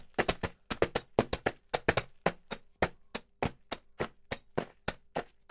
Horse Galloping
I recorded , this sound using the sand box , that was available to me in the SoundBooth on my campus. I created the horses hoof running sound, by stomping a wooden block into the sand box repetitively and I tried to mimic how a horse would run or move. I also played around with the pacing of the sound recording. So it can be used for a horse that is speeding up and slowing down.
running, hooves, Horse, race